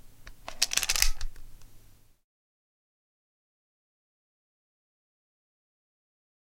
BB gun crank handle, sounds like some sort of crank noise
cowboy, crack, crank, lever